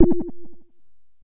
A soft filtered ping sort of sound, almost like a sonar perhaps. From my Nord Modular.